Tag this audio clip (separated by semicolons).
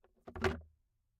Bucket; Foley; Tree; Wood